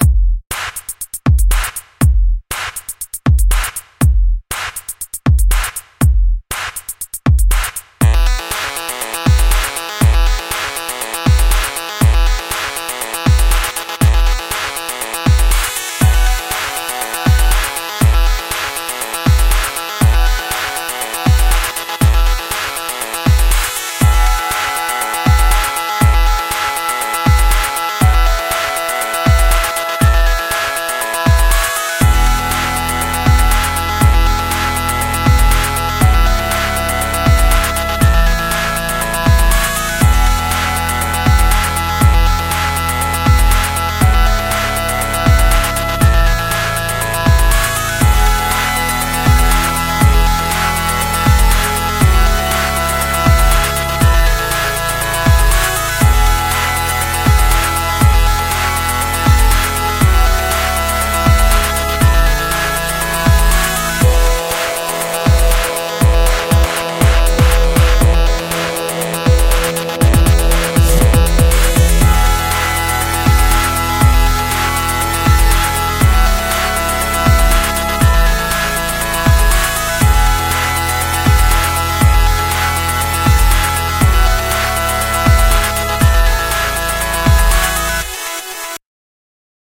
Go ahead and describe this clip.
Happy Dance Groove Short Song
song, synth, happy, dance, digital, short, groove